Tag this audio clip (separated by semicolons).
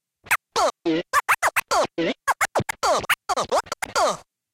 rap; golden-era; 90; s; turntable; classic; hip-hop; hiphop; dj; scratch; acid-sized